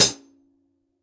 hihat closed7
X-Act heavy metal drum kit. Zildjian Avedis Quick Beat 14". All were recorded in studio with a Sennheiser e835 microphone plugged into a Roland Juno-G synthesizer. Needs some 15kHz EQ increase because of the dynamic microphone's treble roll-off. I recommend using Native Instruments Battery to launch the samples. Each of the Battery's cells can accept stacked multi-samples, and the kit can be played through an electronic drum kit through MIDI. Excellent results.
tama, hi-hat, hihat, kit, heavy, rockstar, metal, drum, zildjian